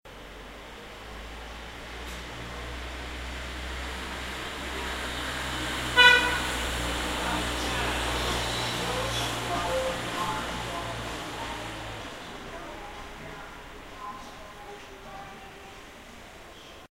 car passes from right to left playing horn and music. Recorded wih Sony stereo DS70P and iRiver iHP120/ un coche pasa de derecha a izquierda tocando musica y la bocina